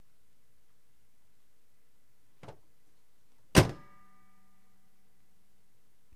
car hood close
this is a recording of a 2000 Buick Lesabre having its hood shut.
car, hood, engine